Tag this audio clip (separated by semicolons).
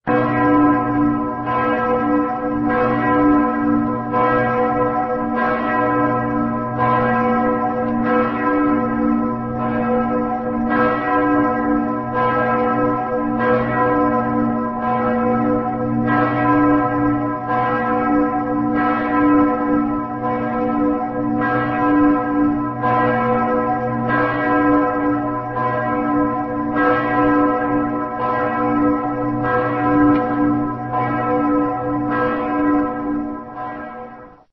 bell; cologne; dom; glocken